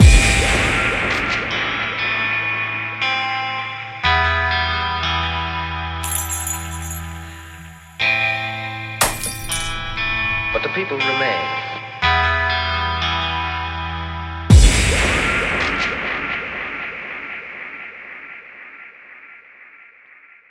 SemiQ intros 21
This sound is part of a mini pack sounds could be used for intros outros for you tube videos and other projects.